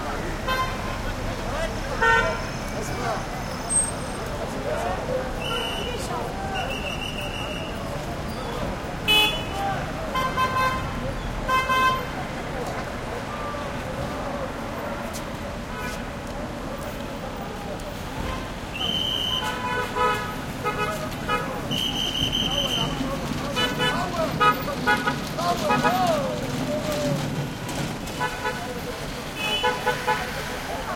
traffic medium Middle East tight intersection market entrance with throaty motorcycles whistle cop and horn honks2 more honks and cart wheel Gaza 2016
city, Middle